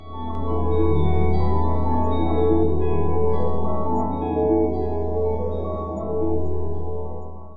Echo Lab Loops v1
echo, samples, loop, guitar, delay, electric